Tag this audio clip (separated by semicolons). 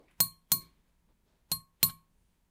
break; Glass; shatter